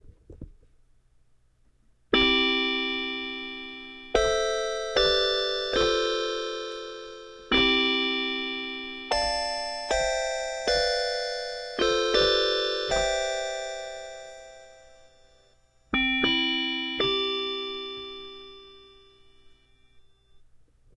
Just some sounds on an old Yamaha PSS-140